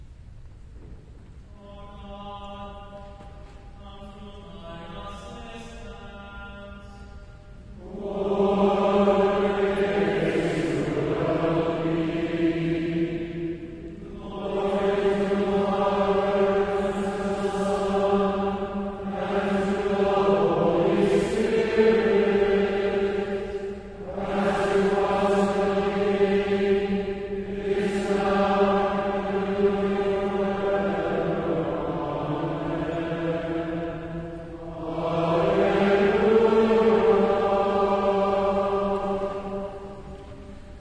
Someone asked for recording of church services, specifically a leader or priest talking and the congregation responding. The three files that begin with "02-" were recorded at a large Roman Catholic cathedral, and has more interaction, but also has chants, and as you can hear, the space is very "live".